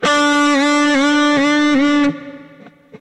12th fret notes from each string with tremolo through zoom processor direct to record producer.
note, dive, guitar, tremolo, whammy, electric